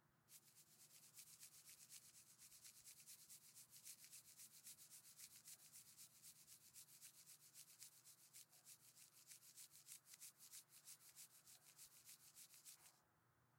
Fast scratching noise